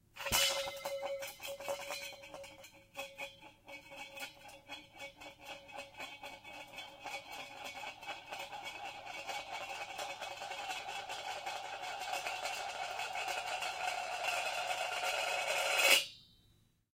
Small metal lid spins around on floor. Every crash of junk needs this at the end. Recorded with ECM-99 to Extigy sound card. Needed some elements for a guy crashing into some junk. Accidentally had phonograph potted up on mixer - 60 cycle hum and hiss may be present. Used noise reduction to reduce some of this.